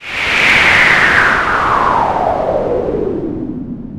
marion herrbach06
description de base: bruit généré sur Audacity. fondu en ouverture et fermeture. Phaser
typologie: continu complexe
morphologie:
-masse: son seul complexe
-timbre harmonique: pas d'harmonie, soufflement
-grain: rugueux, comme un bruit de bouche, gros grain.
-allure: régulier
- dynamique: attaque douce et graduelle
-profil mélodique: glissante comme le vent.
Audacity, bruit, gnr, sur